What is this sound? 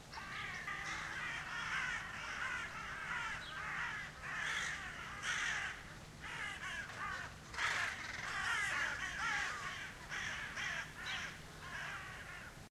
Raven many
You hear many raven cry.
bird, birds, crow, field-recording, raven